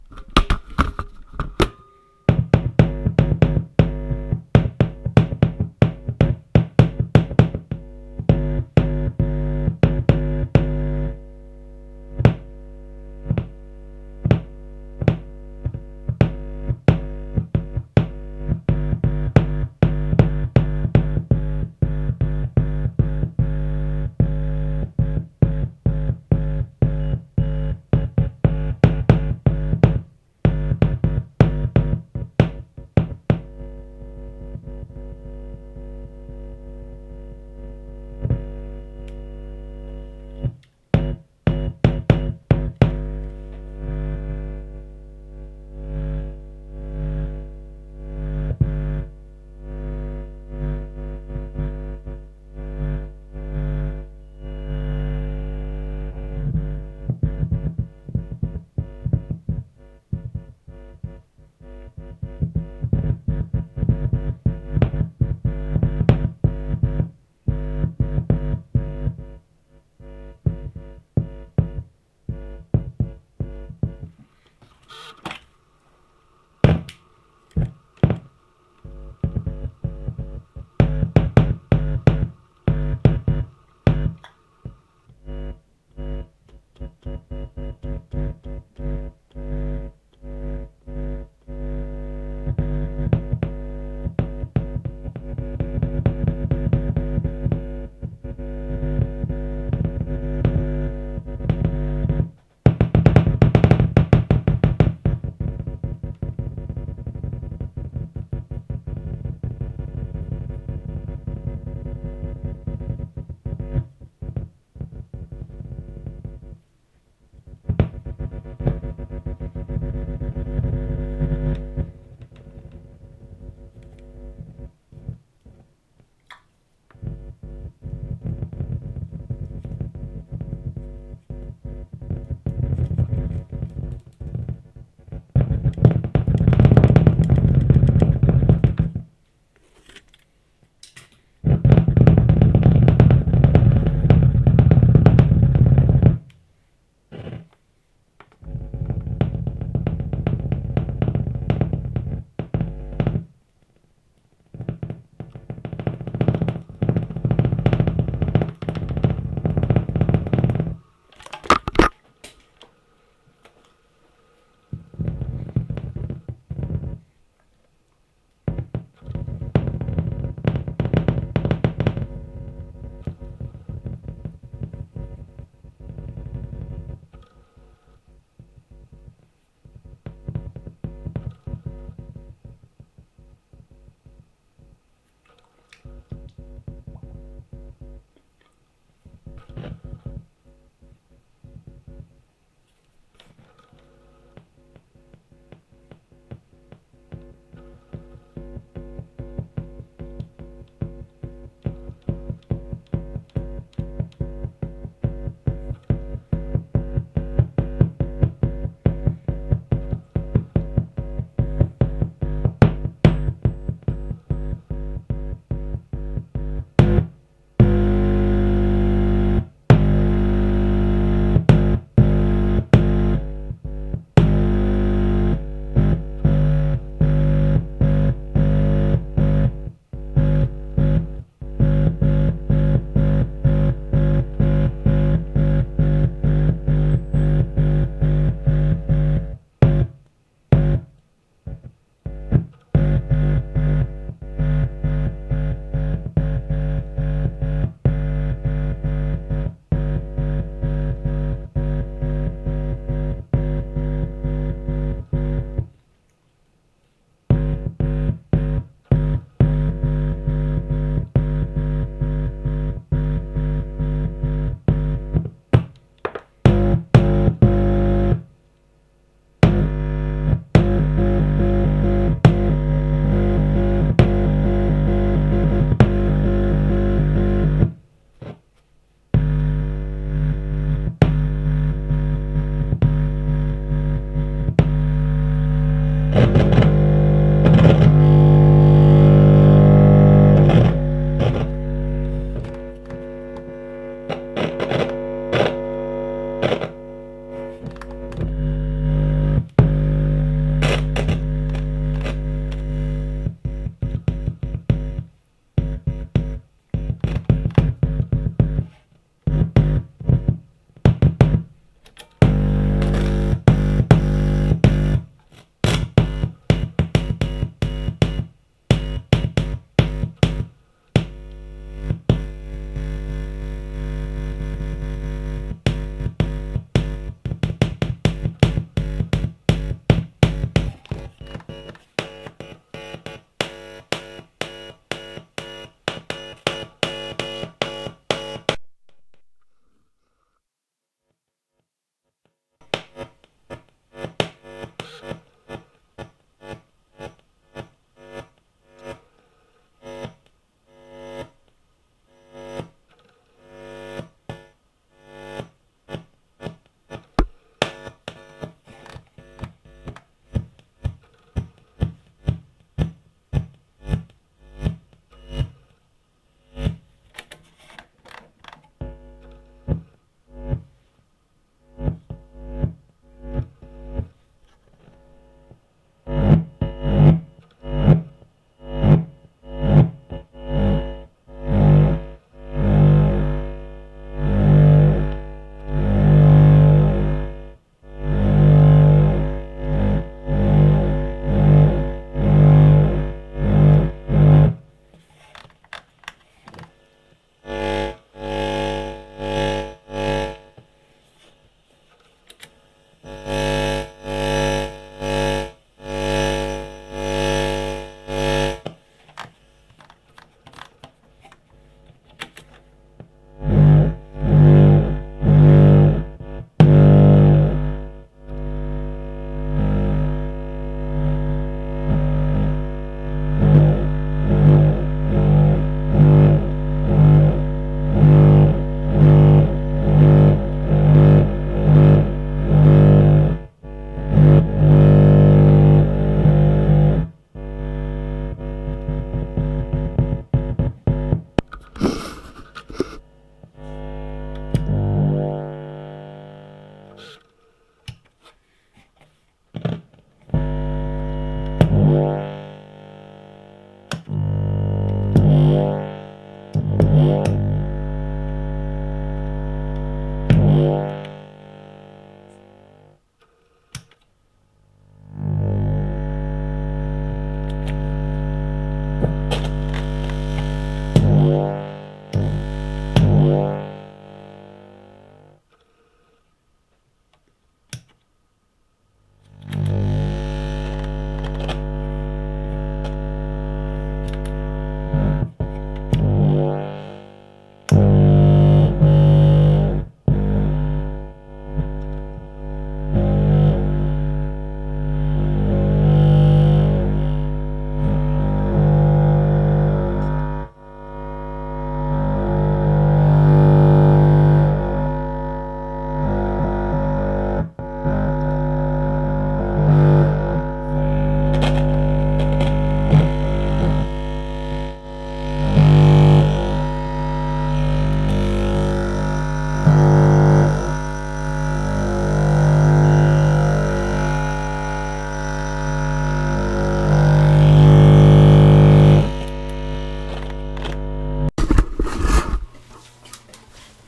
You can get some surprisingly cool sounds just from touching a stereo minijack with your fingers. It was hooked up to an amplifier, and the output from the speakers was recorded on my minidisc with a small mic. This is a long sample but there is a lot of variation in the sounds, have a look around. Mono.